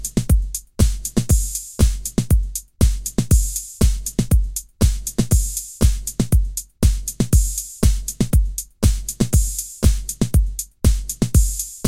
vdj italo beat
Italo Beat mixed by Troy on Virtual DJ
beat, drum